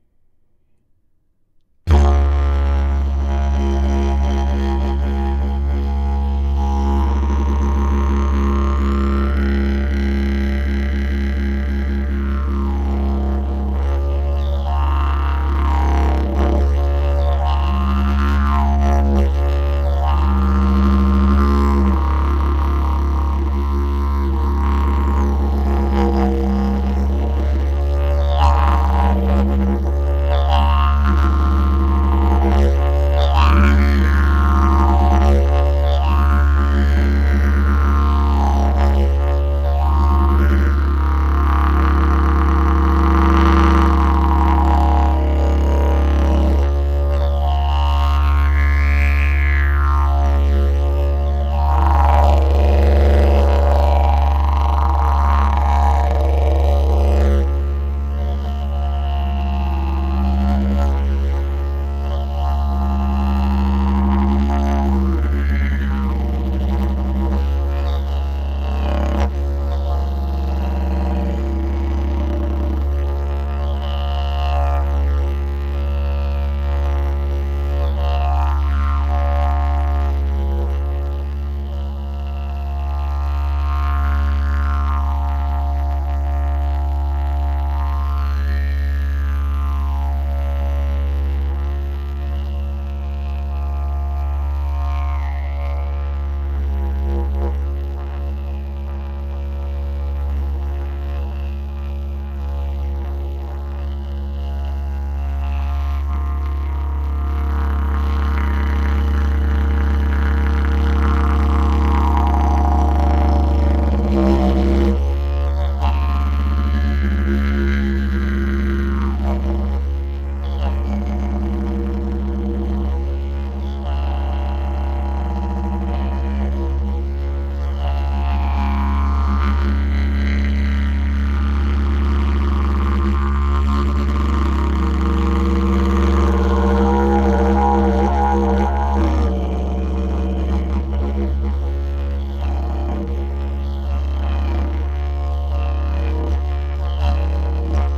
drone key-d
Slow drone played on a didgeribone, a sliding type didgeridoo.
Studio-Projects B-1 -> Sound Devices 722 -> slight processing including limiting in adobe audition.
didgeribone, didjeridu, drone, didgeridoo, d